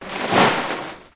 Aggressive Clatter 01
Aggressive debris being tossed and clattering (1).
junk, idiom, crash, impact, rattle, garbage, shuffling, debris, clatter